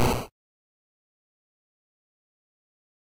Pixel Sound Effect #3
Free, Pixel, Sound